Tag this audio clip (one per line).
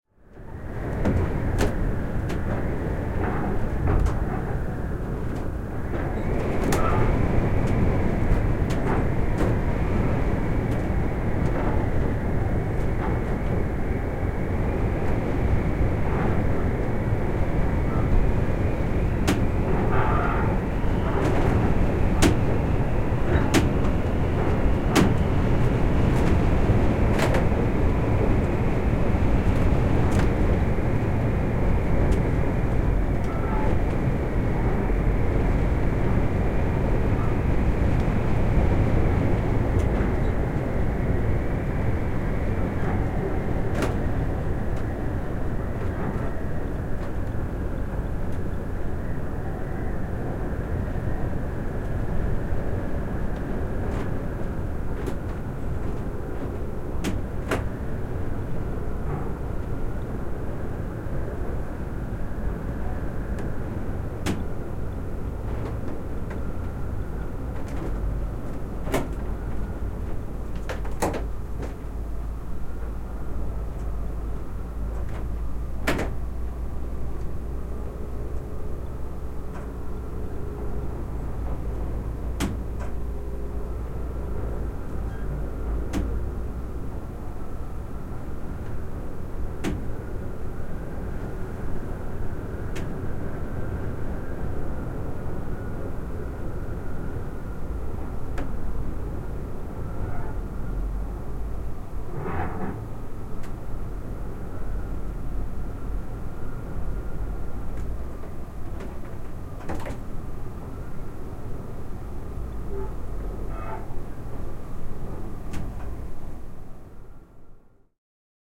Field-Recording
Finland
Finnish-Broadcasting-Company
Luonto
Nature
Soundfx
Suomi
Tehosteet
Tuuli
Tuulimylly
Wind
Windmill
Yle
Yleisradio